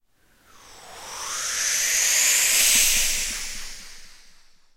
to make this one, I just had to inhale loudly
riser, one-shot, wind, air, inhale